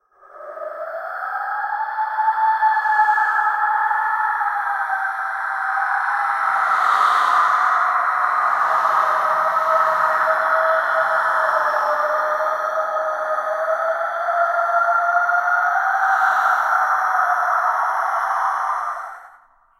banshie scream
banshee, fear, ghost, haunted, horror, monster, pain, phantom, scary, screak, scream, screech, terror, thrill